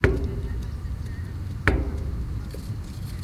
Metal coated tree root rattle Double 120bpm
Metal coated tree with mallet and stick samples, recorded from physical portable recorder
The meadow, San Francisco 2020
metal metallic resonant percussive hit percussion drum tree field-recording industrial impact high-quality city
high-quality, drum, metallic, industrial, tree, resonant, percussion, hit, metal, city